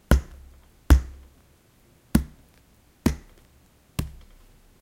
bike seat
hitting leather seat of a bike
seat, bicycle, leather, bike